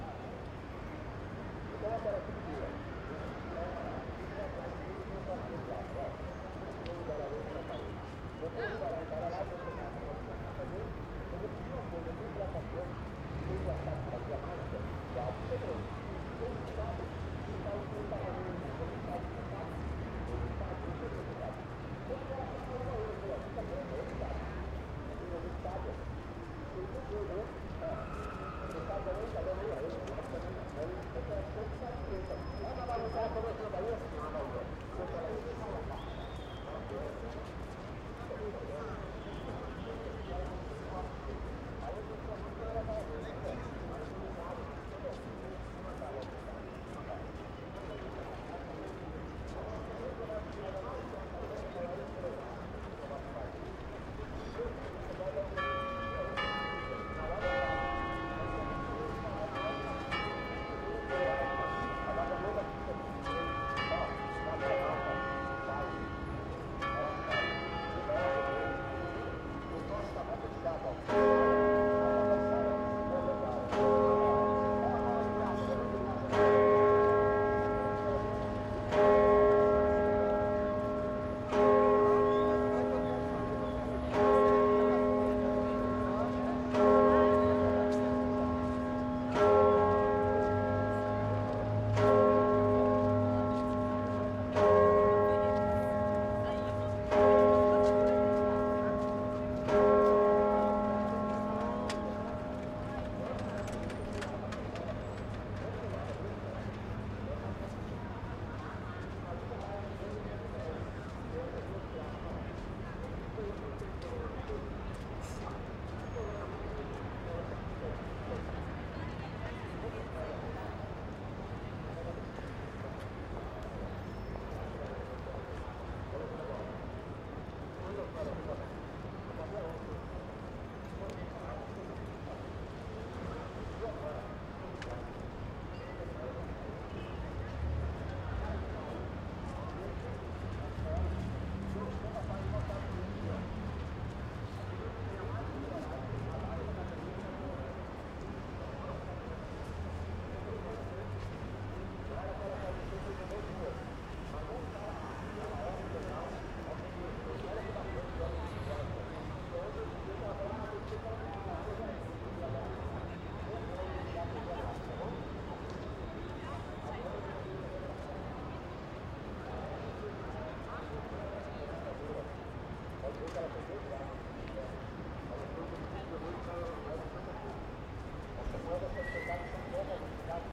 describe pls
Street ambience and Mosteiro de São Bento's bell.
Ambiente de rua com sino do Mosteiro de São Bento, São Paulo, canais 01 e 02.
Recorded with Sennheiser MKH-416 and Tascam DR-680 for "Os Irmãos Mai" project, short-length film directed by Thais Fujinaga.
ambiente, bell, cathedral, church, church-bell, igreja, mosteiro, mosteirodesaobento, rua, saobento, sino